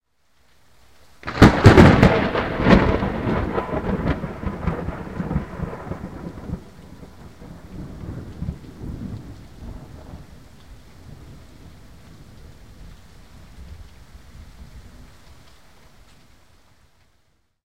This thunder was recorded by my MP3 player in a very large thunderstorm in Pécel, Hungary.